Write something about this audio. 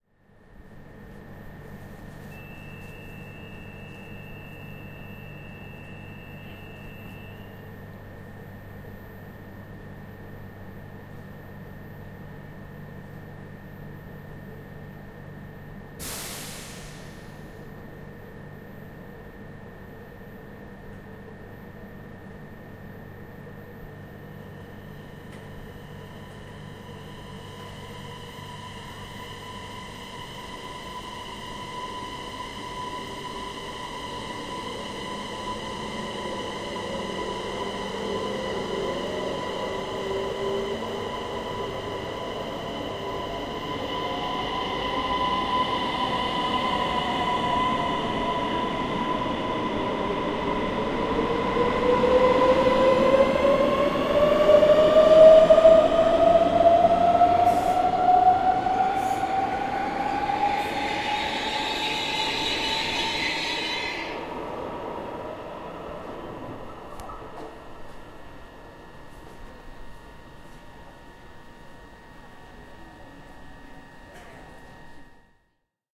A regional train taking off from Malmö Central Station in Malmö, Sweden.
station, Train, subway, ambience, takeoff, foley